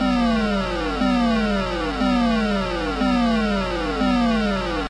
annoying drone 2
Annoying Electro Drone noise
space, retro, drone, space-invaders